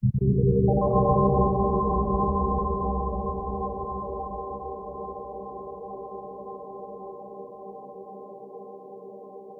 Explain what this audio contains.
KFA17 100BPM
A collection of pads and atmospheres created with an H4N Zoom Recorder and Ableton Live
calm, euphoric